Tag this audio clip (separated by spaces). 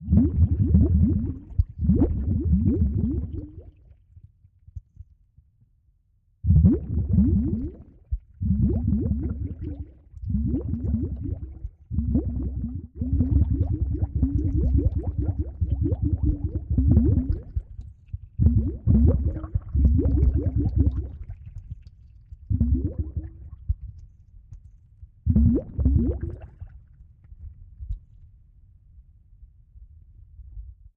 Poison videogame Bubbles